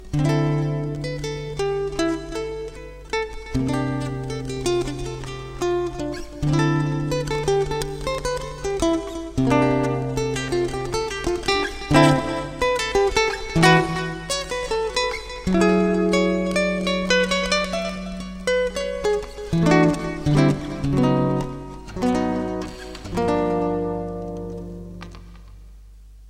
a short guitar intro (nylon strings) using 7th chords, and a mix of picking and strumming